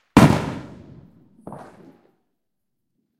NYE Single Boom
Single loud aerial fireworks boom.
boom, explosion, fireworks, new, new-years-eve, rocket